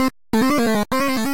bertilled massive synths